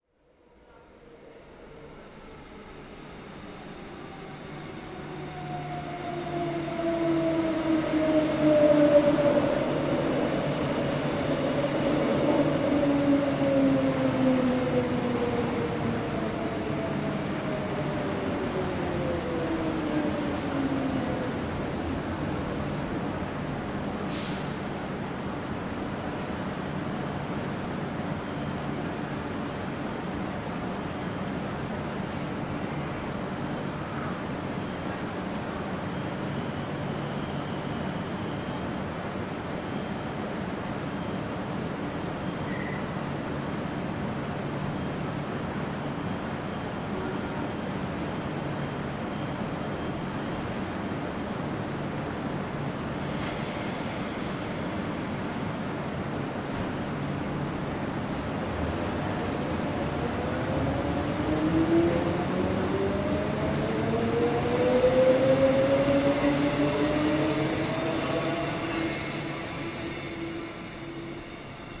SFX Train Arrive and Leave
Train arrives at station and then departs
announcement, arrive, depart, platform, railway, station, train